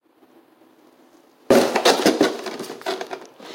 snd box smash stuck
Sound of a box being smashed into